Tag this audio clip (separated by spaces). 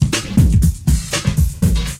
fun funk live